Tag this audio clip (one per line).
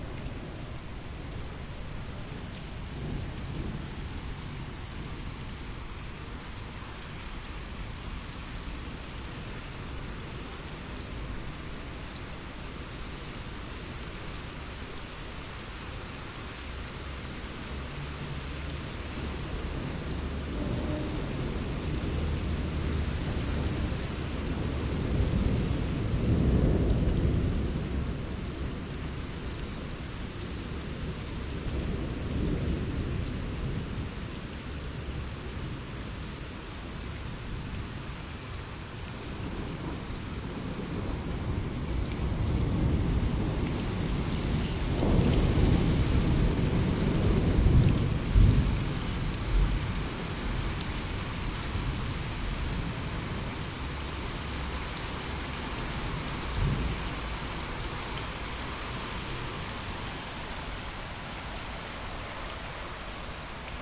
rain
storm
thunders